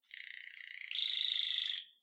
my canary doing something like a trilling effect